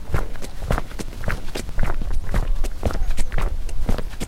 seamlessly loopable recording of footsteps on pavement